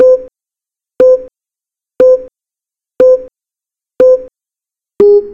Standard beep pre-start
There is 6 beeps all 1 second apart. It is meant as a 5 4 3 2 1 GO.
Enjoy :)
beep, gun, horn, pre, sail, sailing, sequence, start